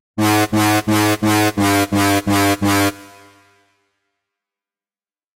DnB&Dubstep 011
DnB & Dubstep Samples